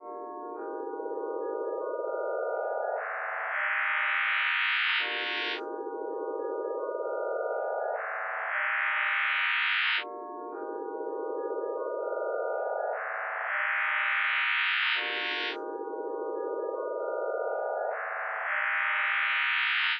More melodic sequences and events created with graphs, charts, fractals and freehand drawings on an image synth. The file name describes the action.